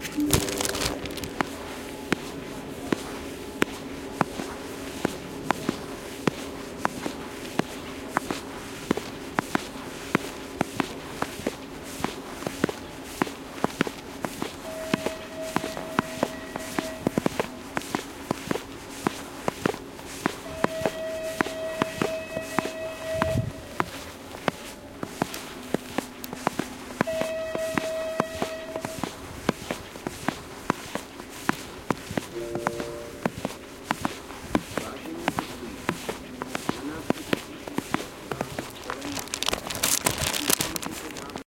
chuze dvou dam po peronu vlakoveho nadrazi
two ladies walking along the platform train station
foots, footsteps, ladies, train-station, walk